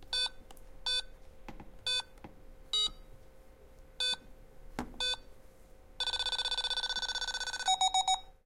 mySound GPSUK electronic toy calculator
An electronic toy calculator from the board game monopoly
Galliard, electronic, toy